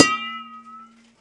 pot with water
a, b